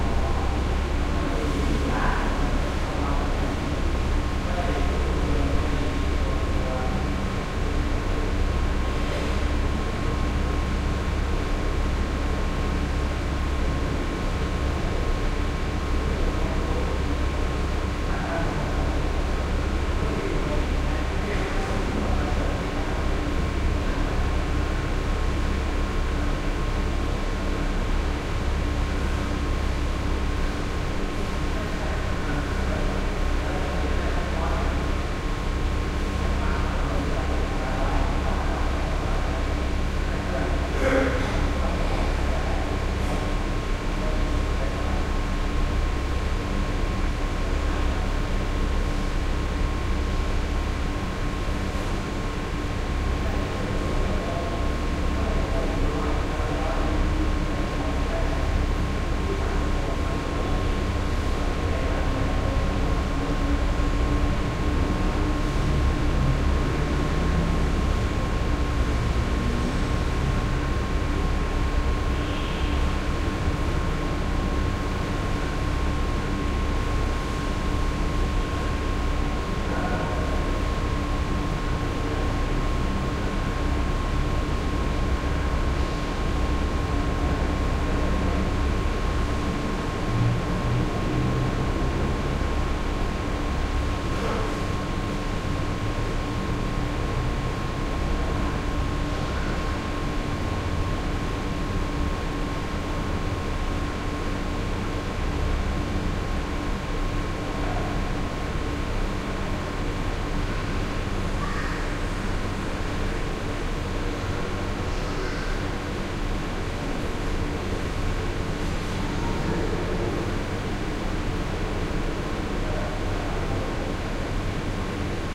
Thailand hotel hallway heavy ventilation hum +distant voices, man spits middle
hallway, hotel, hum, Thailand